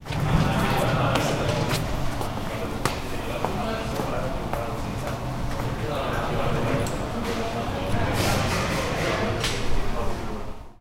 Library environment
This is the environment sound of the first floor at UPF library. We listen footsteps and conversations. This sound was recorded in the library of UPF.